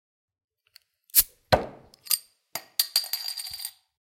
Opening a bottle of beer.
open, cap, beer, drink, bottle, opening